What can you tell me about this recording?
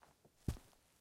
Footstep Carpet 01
A recording of boots walking on carpet
boot, boots, carpet, foot, footstep, footsteps, step, steps, walk, walking